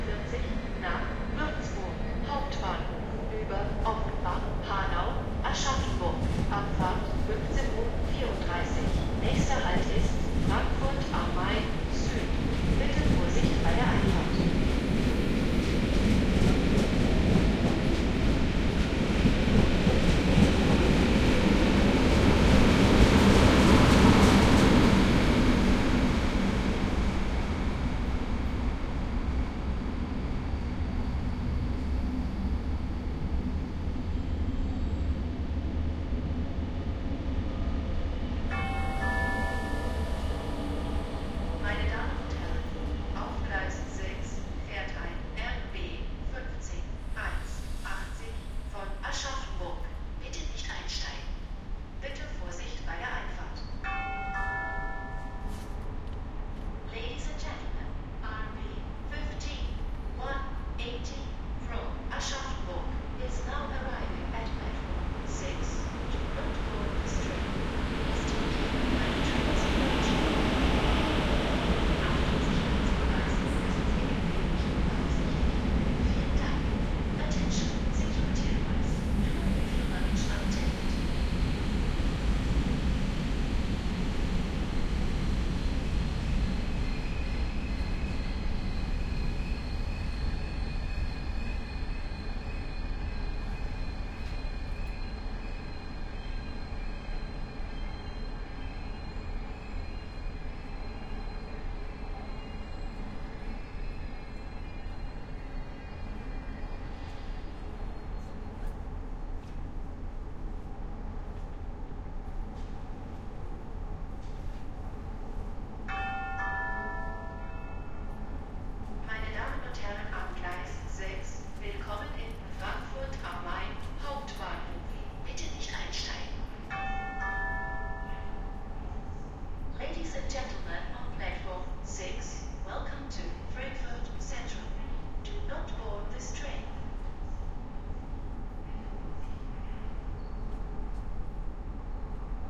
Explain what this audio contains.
Railway platform Frankfurt station pt1
Thats what a call a pretty good railwaystation athmos! Frankfurt mainstation, waiting on a platform. Love it! Primo EM172 mics into Sony PCM-D50.
field-recording, frankfurt, railway, station